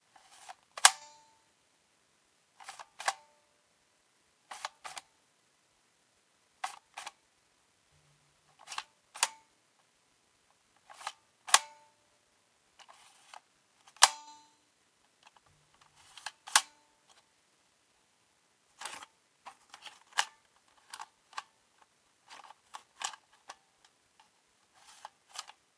View Master Sounds
Remember those view masters? This is what the wonderful plastic vintage vr-glasses sounded like.